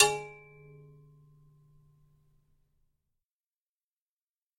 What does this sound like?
Quiet with loud impact.
sword, metal, ping, impact, field-recording, metallic